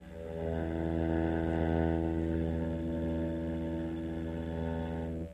Recorded on a Peavy practice amp plugged into my PC. Used a violin bow across the strings on my Squire Strat. This is the lower (open) E note.
bowed, electric, experimental, guitar, note, real, string